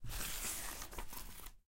07/36 of Various Book manipulations... Page turns, Book closes, Page